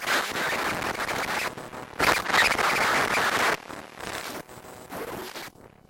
macbook air
macbook, air, coil, mic